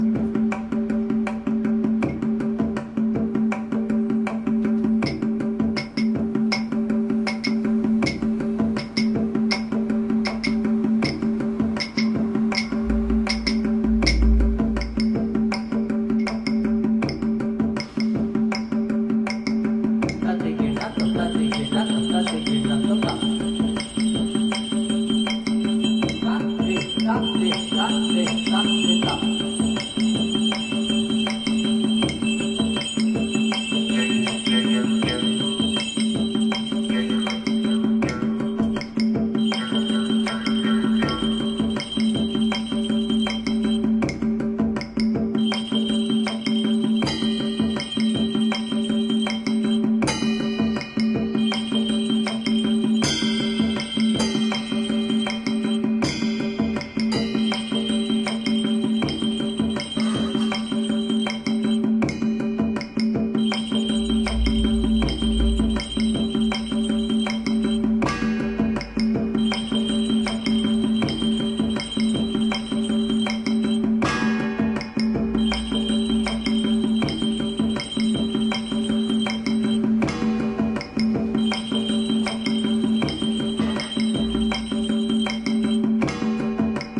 Mridangam, morsing and bells in electroacoustic music
This is a recording made in a rehearsal session for an electroacoustic orchestra. Morsing (A jaw-harp like instrument used in Carnatic music), Mridangam and Bells are heard against the backdrop of an ambient sound scape.
drum, percussion, electro-acoustic, ambient, mridangam, morsing, geo-ip, indian